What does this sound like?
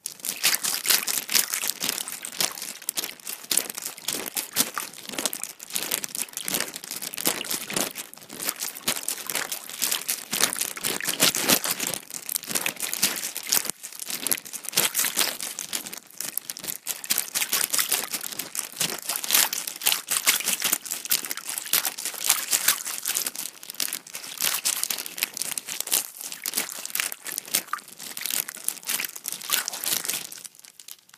I put some tap water in a ziplock bag was swishing it around in my hand.